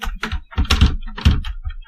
Door Locked
Pulled on my locked door and removed background ambience
used a iphone 6 to record it
Jiggle, Door, Shake, Handle